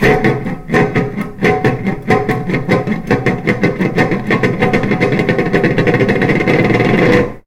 spinning bowl until it stops
inside-sample, spinning-bowl, bowl, spinning